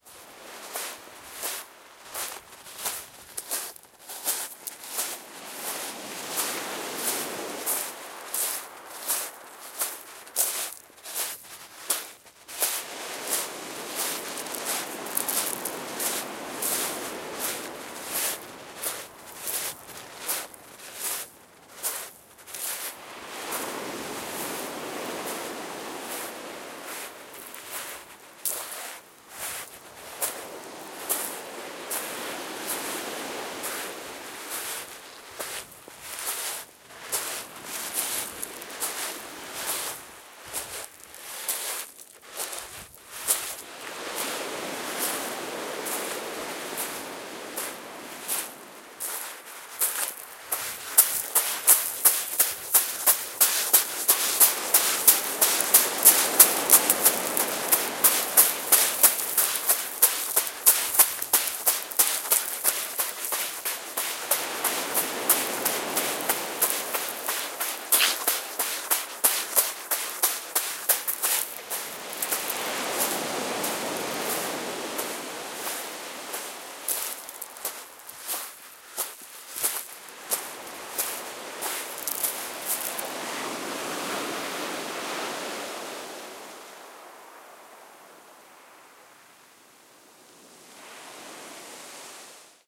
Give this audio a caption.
Beach, Pebble: Walk, Jog
Walking on a pebble beach, various pace speeds.
Stereo XY-coincident pair @ 44k1Hz.